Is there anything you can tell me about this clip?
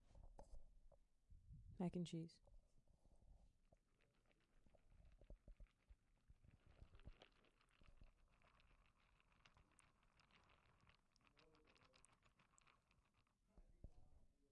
Swirling Mac and cheese and water around